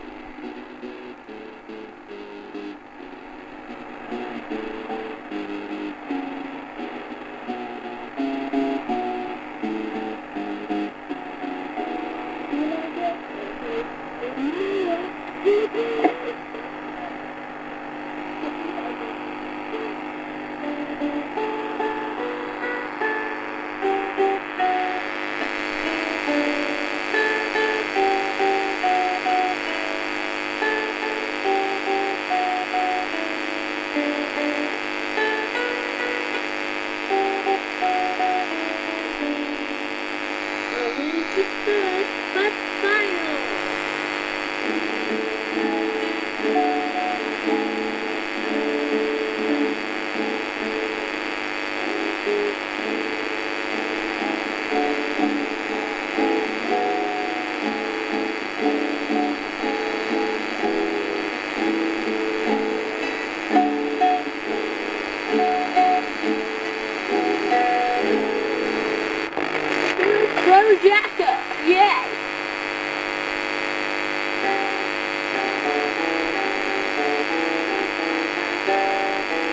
Recoding of an old Amstrad tape recorder playing a broken tape, strange guitar and talking can be herd from tape, very low quality.